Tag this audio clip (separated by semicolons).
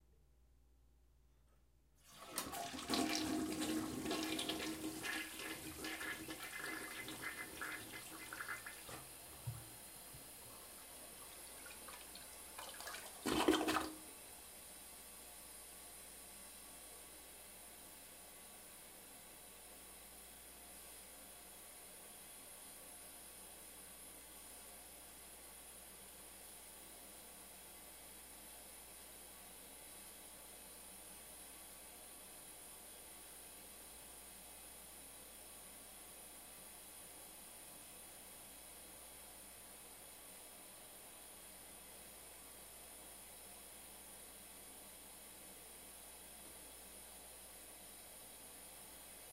bathroom; water